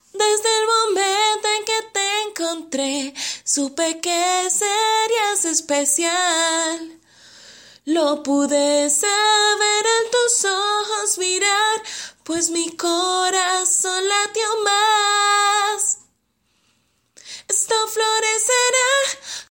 radio radio1 radio2